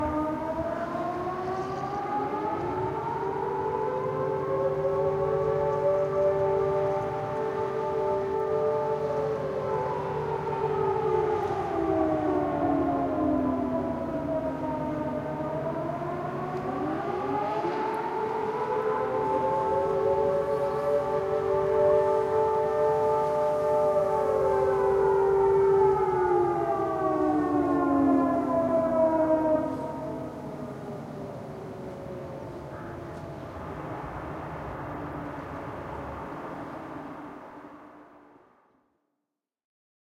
Alarm horn attention bombardier aircraft nuclear ww2 raid siren
Recording of civil air-raid defense signal siren during test run. Recorder DR100 mk3.
air, air-raid, airhorn, alarm, civil, defense, disaster, emergency, federal, horn, hurricane, nuclear, raid, signal, siren, test, tornado, tsunami, warning, ww2